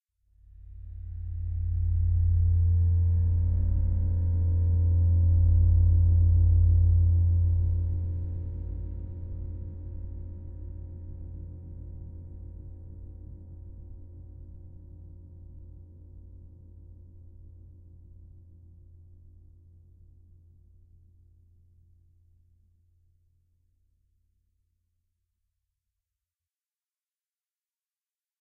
Sample made in april 2018, during participatory art workshops of field-recording and sound design at La Passerelle library Le Trait d'Union youth center, France.
Sample 1
Cash register with multiple delays.
Sample 2
Piece on drum with slow audio.
Sample 3
Ride cymbal with reverb.
Sample 4
Torn paper with bitcrushing.
Sample 5
Trash bin percussion with reverb.
Sample 6
Quantized trash bin rythm.
Sample 7
Percussion on metal and shimmer
Landscape 1
Morning view from the banks of the Saone, around Trévoux bridge, France.
Landscape 2
Afternoon carnival scene in Reyrieux, France.
ambience, atmosphere, cinematic, dark, processed, soundscape